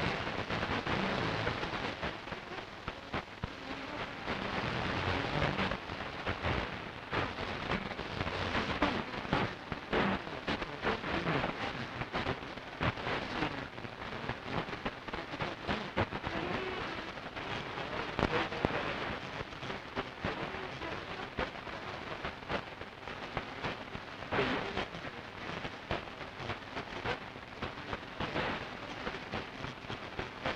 Radio Static Off Station
Some radio static, may be useful to someone, somewhere :) Recording chain Sangean ATS-808 - Edirol R09HR
noise, radio-static, short-wave, tuning